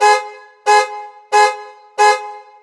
Car Alarm in Parking Structure
structure, parking, car, alarm